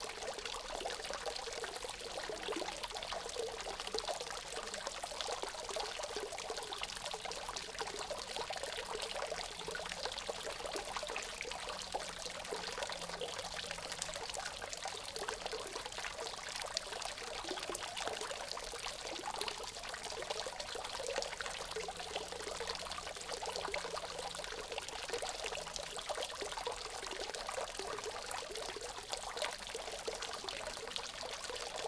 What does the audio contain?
Water splattering sound on a arabic-like fountain. Rode NTG-2 into Sony PCM-M10 recorder.